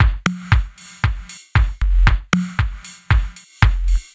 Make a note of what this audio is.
house beat 116bpm with-01
reverb short house beat 116bpm